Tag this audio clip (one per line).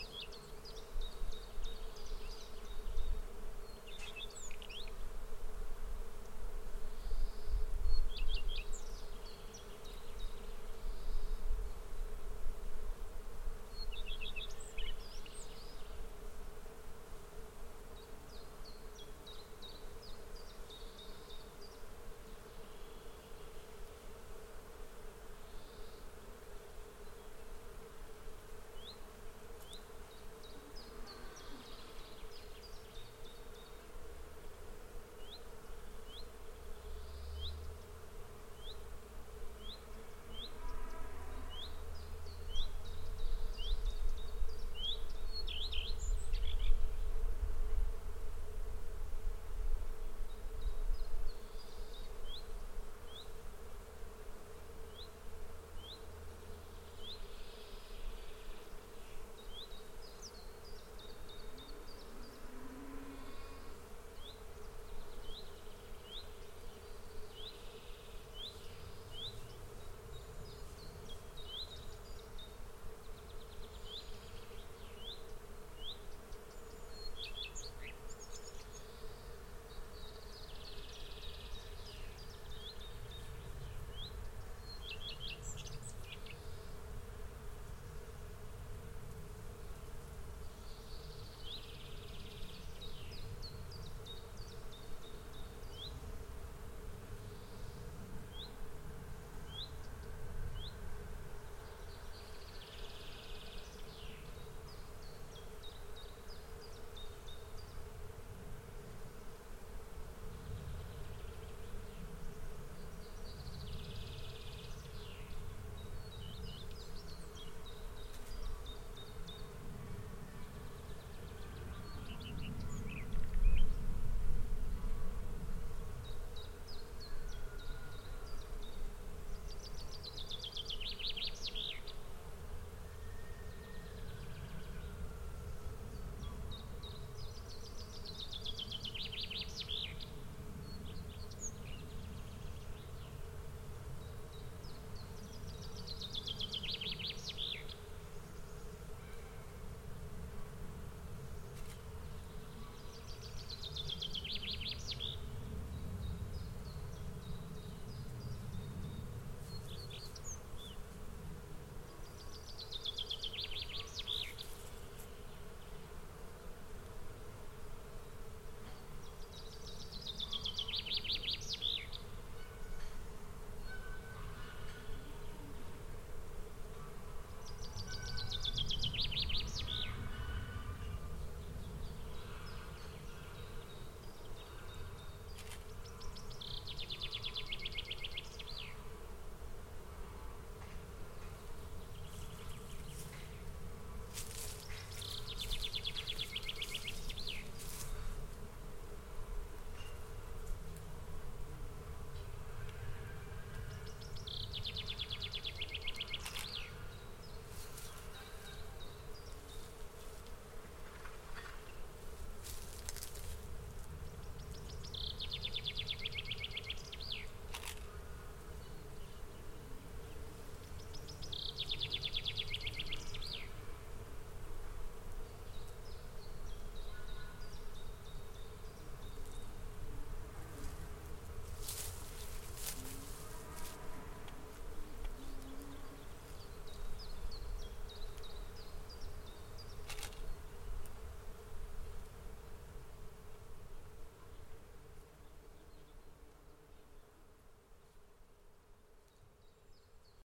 other
animal
plain
natura
cars
harvest
nature
dogs
car
wies
birds
country